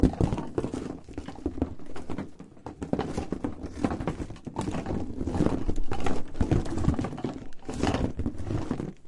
objects in a cardboard box. simple source sound